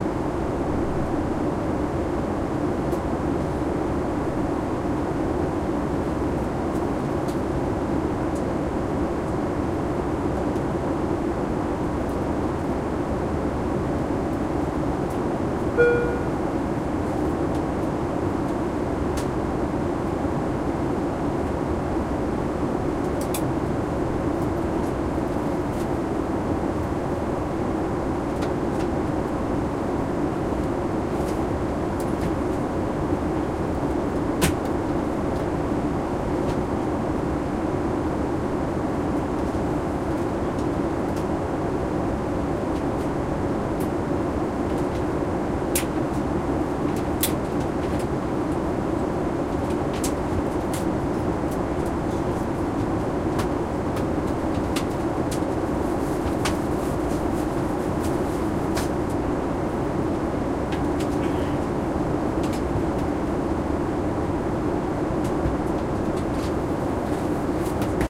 Aircraft (747-400), Interior, Midflight
747-400 passenger jet, interior, midflight.
Recorded on Tascam DR-40.